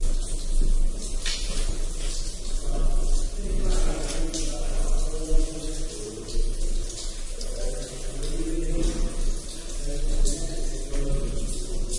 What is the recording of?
doing some recording in a cave in wales (as you do) when some pot-holers came through.
atmospere, pot-holing